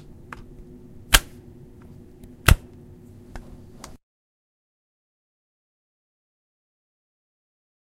sound of a light switch